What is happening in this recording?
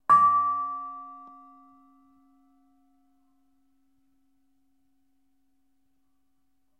Train Wheel Impact Contact Mic - 2
An impact on a train wheel with a contact mic